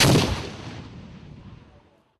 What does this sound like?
M67 Fragmentation Grenade Explosion 3
Specific details can be red in the metadata of the file.